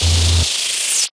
Shaking glitch,noisy(4lrs,mltprcssng)
A glitch effect created artificially. Enjoy it! If it does not bother you, share links to your work where this sound was used.
Note: audio quality is always better when downloaded